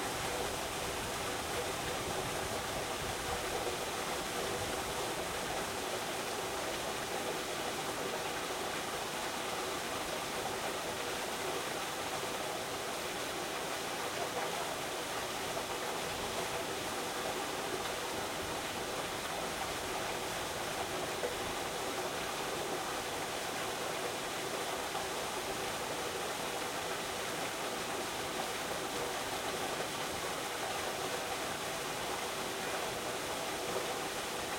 well water surge sewer intake1
recorded with Sony PCM-D50, Tascam DAP1 DAT with AT835 stereo mic, or Zoom H2
intake, sewer, surge, water, well